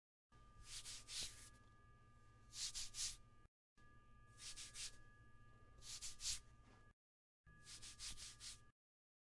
wiping off arms
off, wiping